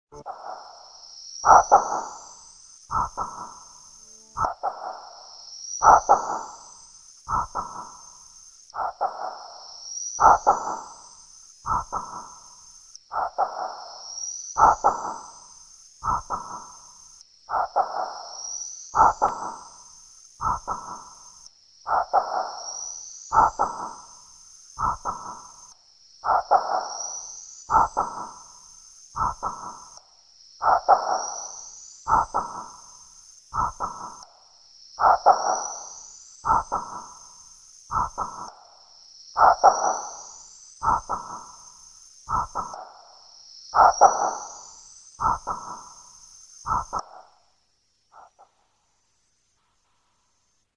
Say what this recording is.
preseknal shepot
A synthesized rhythmic whisper. Equipment used: E-Mu Ultraproteus.
breath, electronic, loop, whisper